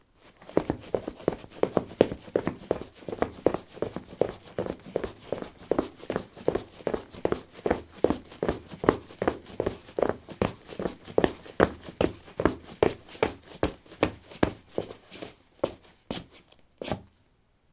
lino 15c darkshoes run
Running on linoleum: dark shoes. Recorded with a ZOOM H2 in a basement of a house, normalized with Audacity.
footstep, footsteps, run, running, step, steps